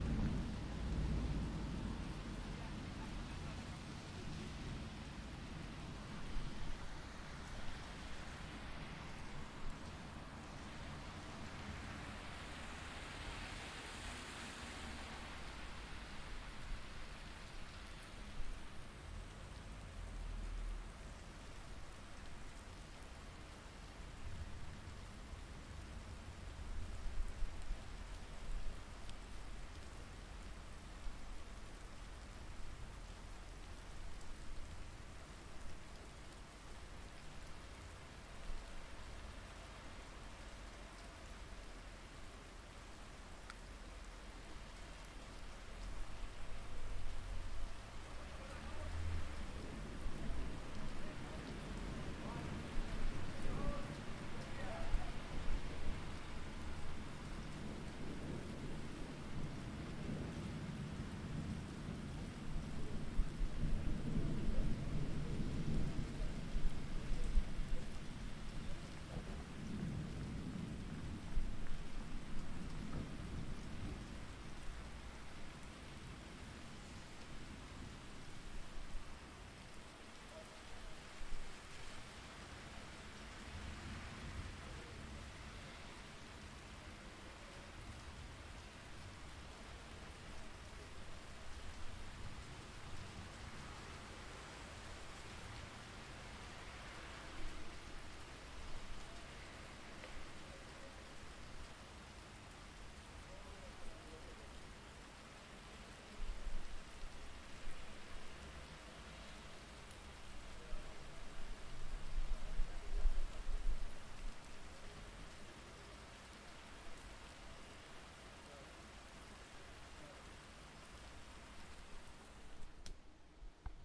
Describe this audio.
Lluvia y tormenta - Rain and thunderstorm
Recording of rain and thunderstorm. You can hear some voices but I think they are easily editable if you need it.
Grabación de lluvia y tormenta. Se pueden oír algunas voces pero creo que son fácilmente editables, si es necesario. Si usas este sonido, por favor, acredita mi nombre: Juanjo Domínguez
Sound recording by Juan Jose Dominguez.